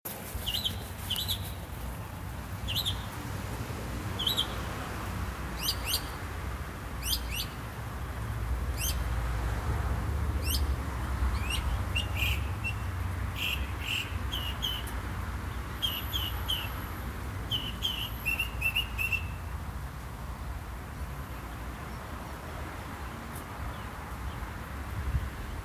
Birds and City Ambience
Recorded outside The White Lotus Lounge Meditation Center on a rainy day.
Enjoy!